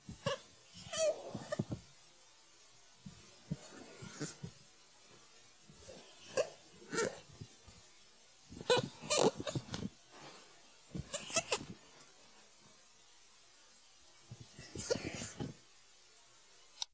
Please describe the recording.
Magazine Circling with Marker d7s
Using a marker to circle things in a magazine
Magazine, marker, Paper, writing